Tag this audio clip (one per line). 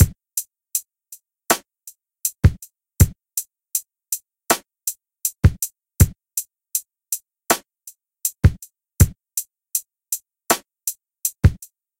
80-BPM
drumloop
kick-hat-snare